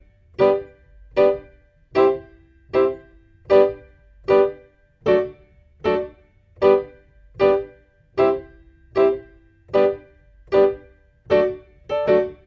Roots rasta reggae